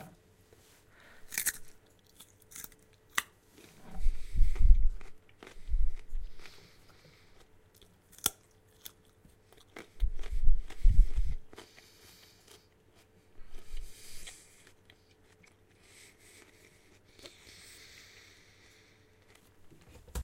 eating carrot
carrot, eat, eating, food, knack, pet